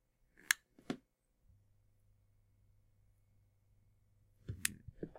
A recording of my creative 5.1 speakers being turned on and off again, a few seconds later. There's a little noise from the speakers as well, if listening carefully.
Recorded with a superlux E523/D microphone, through a Behringer eurorack MX602A mixer, plugged in a SB live soundcard. Recorded and edited in Audacity 1.3.5-beta on ubuntu 8.04.2 linux.
off, office, pc, speaker, turn, volume